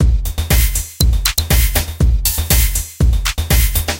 TechOddLoop6 LC 110bpm
loop, odd, techno